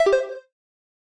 Beep 06 triple 2015-06-22
a sound for a user interface in a game
beep, click, game, user-interface, videogam